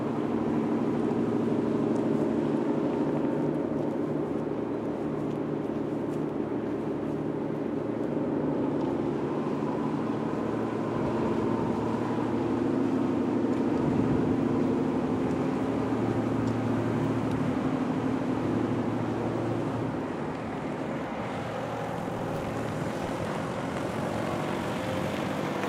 FX - motor barco
ship, motor